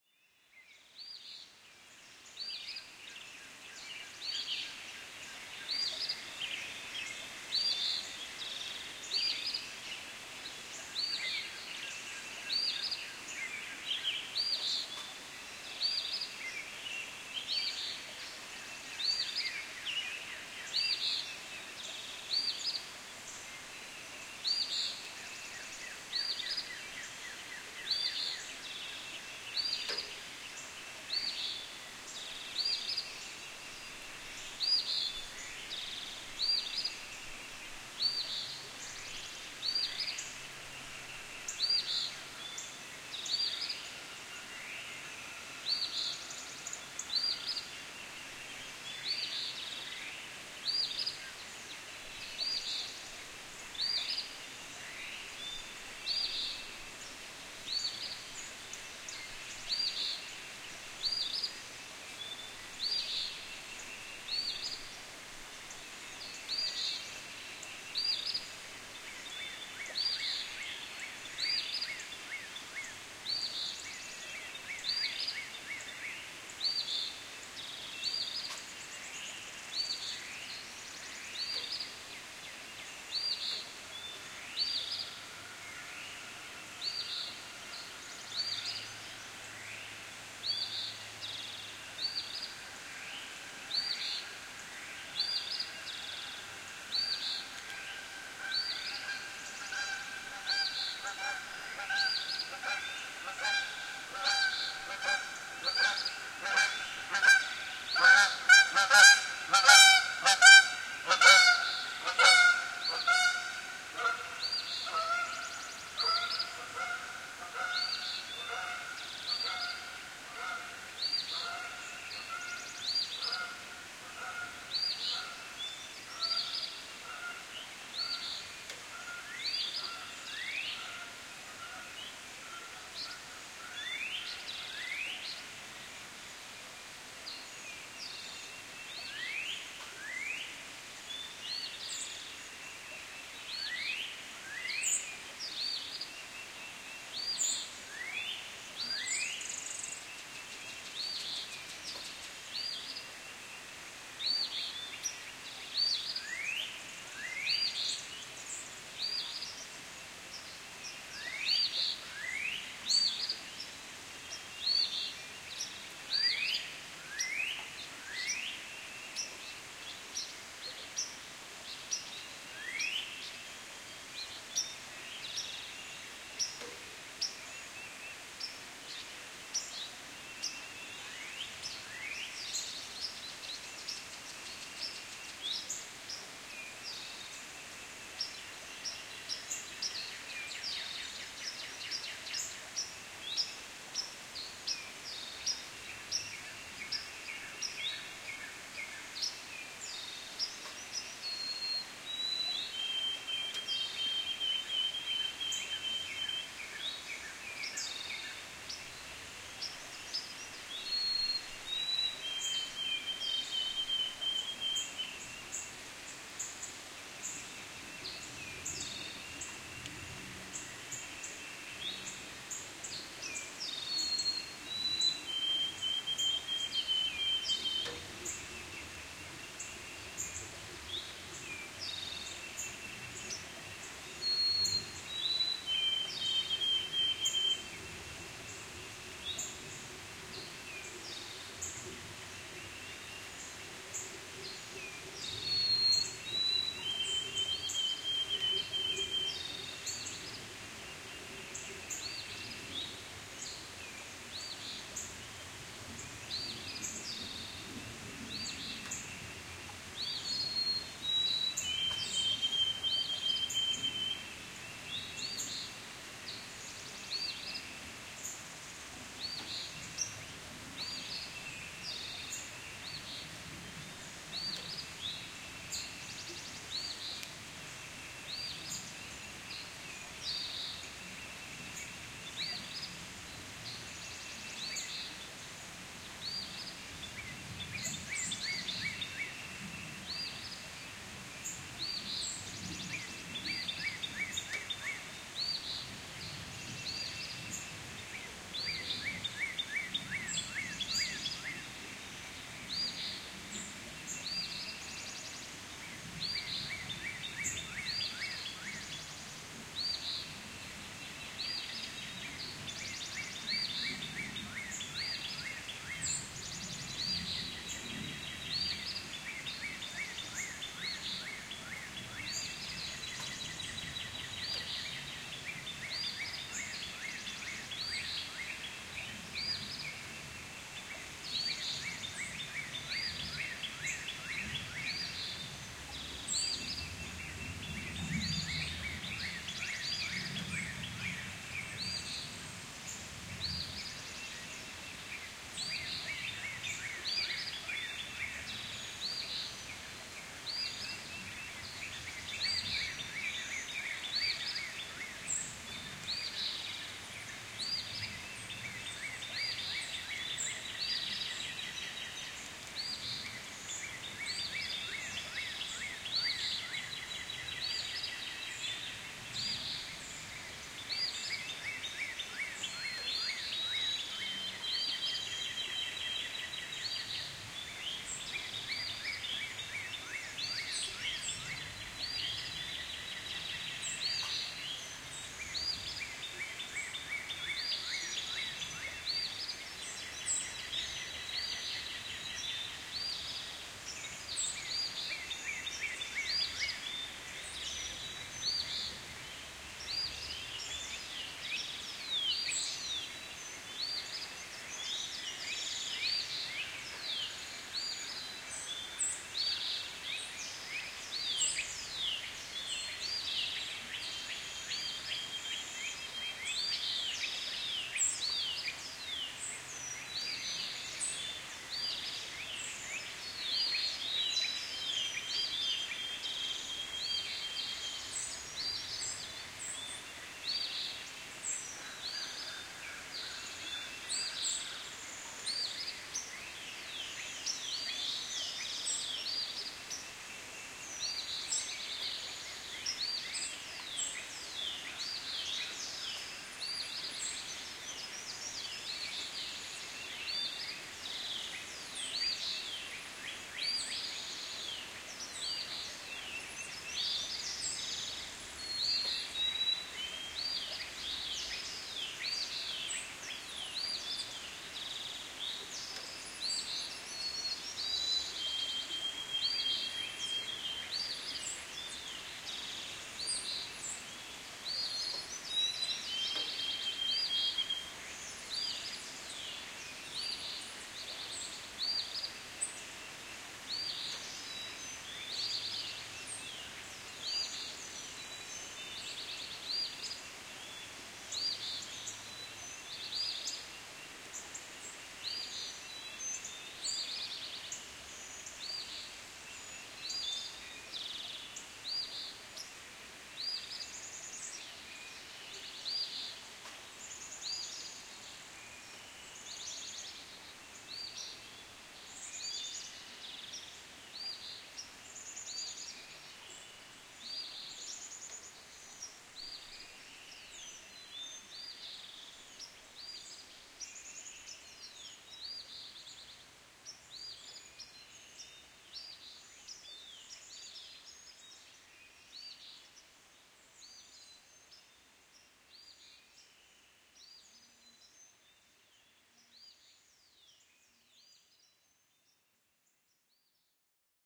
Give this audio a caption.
Morning Birds
This is what it sounds like from my porch in the morning. Birds, a flowing stream, a couple of geese fly overhead.
birds, morning, stream, water